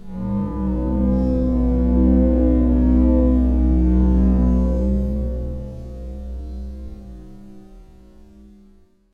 Stretched Metal Rub 6
A time-stretched sample of a nickel shower grate resonating by being rubbed with a wet finger. Originally recorded with a Zoom H2 using the internal mics.
metal, nickel, resonance, rubbed, processed, fx, time-stretched